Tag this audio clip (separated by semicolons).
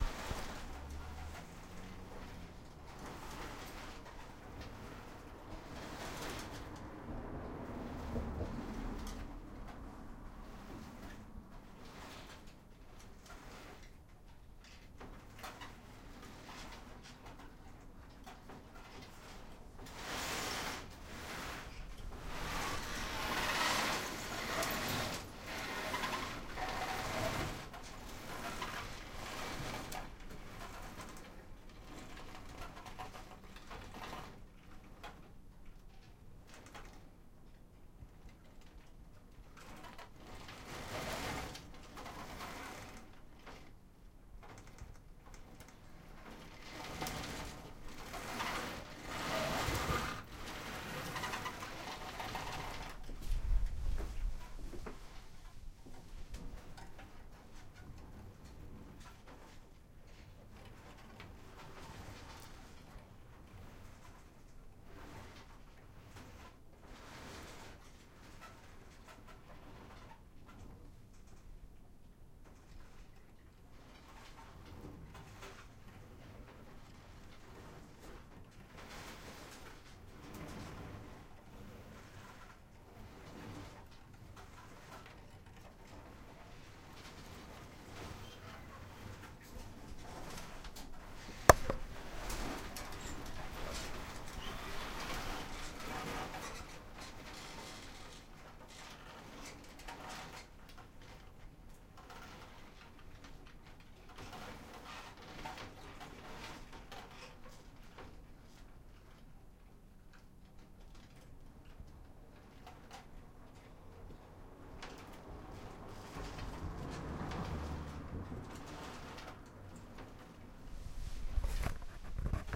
roof; trees; aluminum